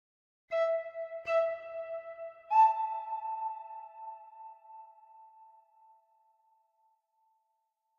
small piccolo flute sample made with electronic music-software
flute, hall, piccolo